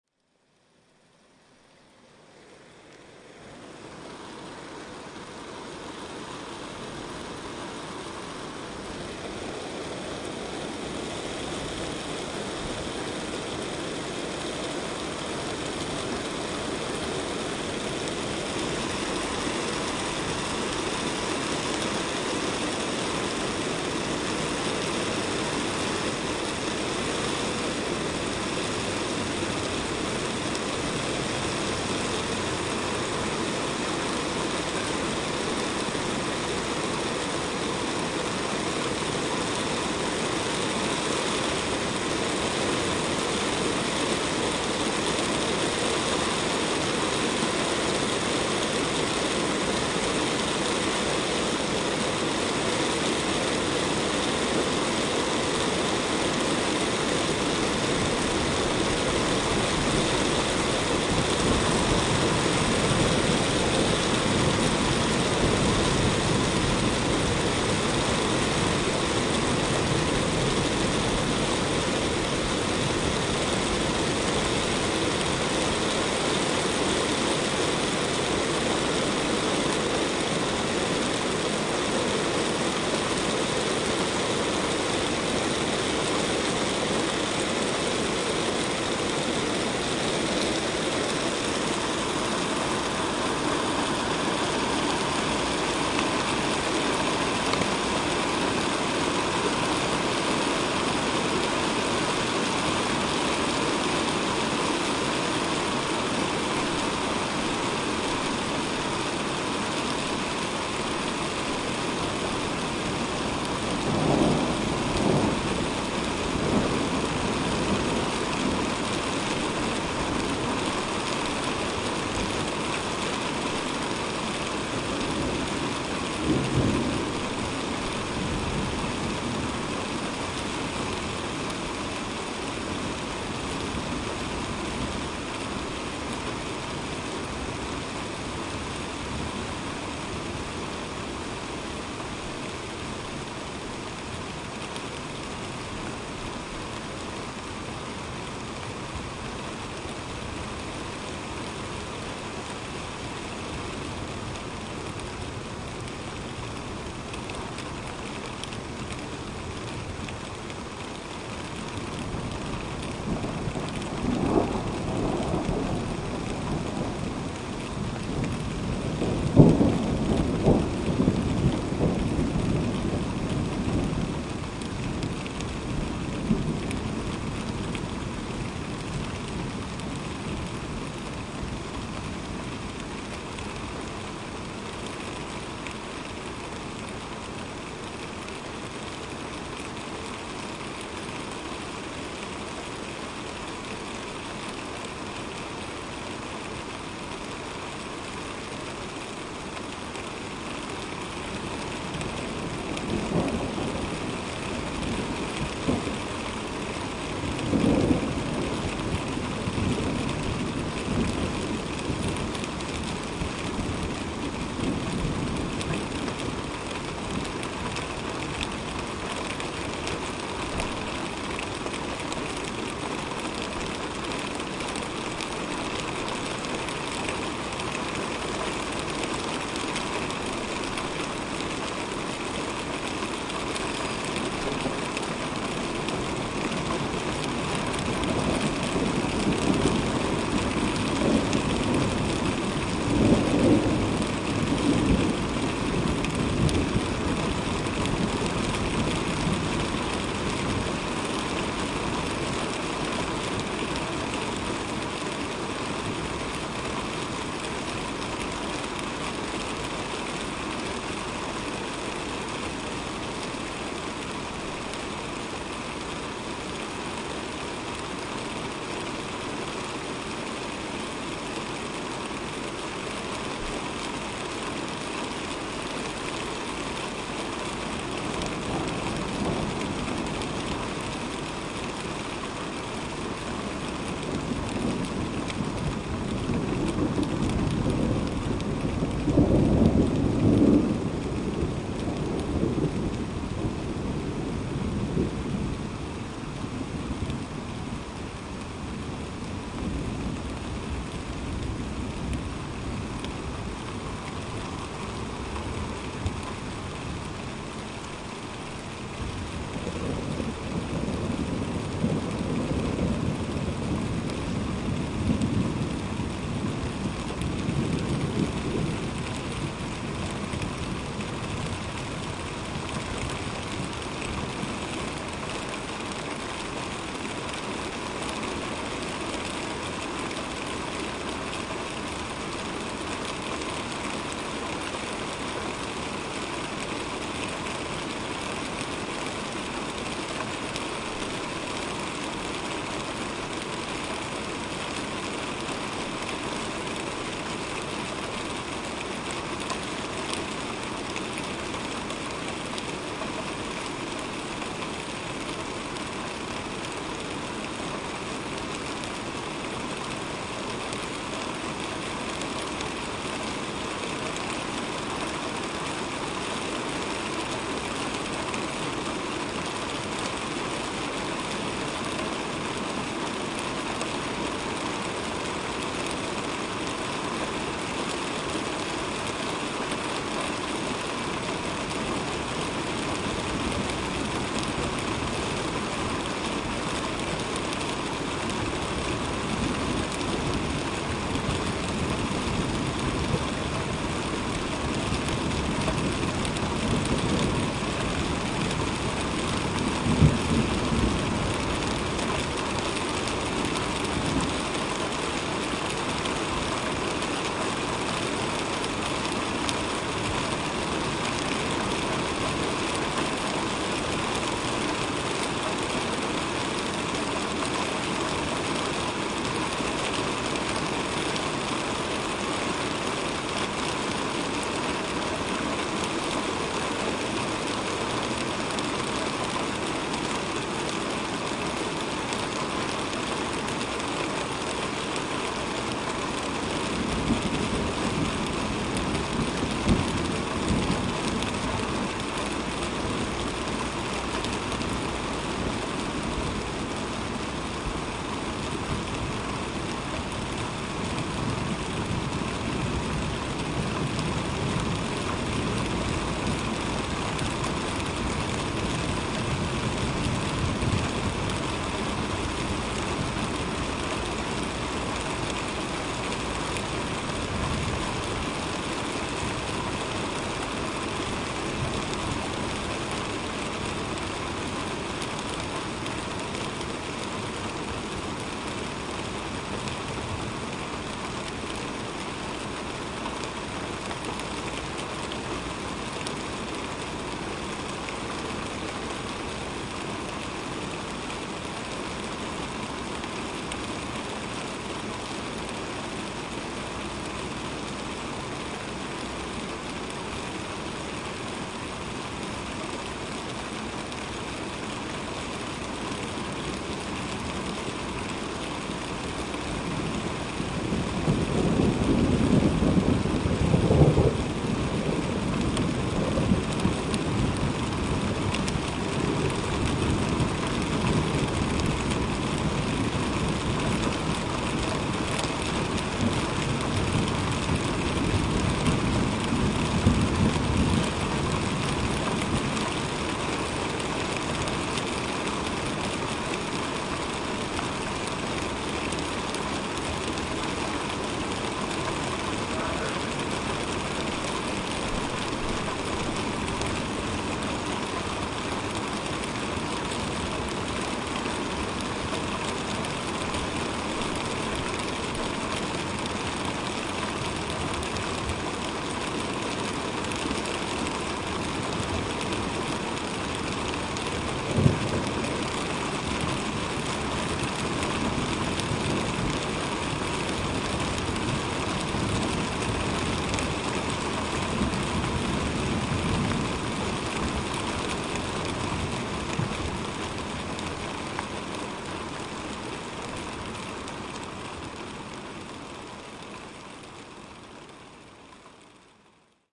Thunderstorm May 11 2015
Like the title says, thunderstorm with a fair bit of rain.
rain, thunderestorm, weather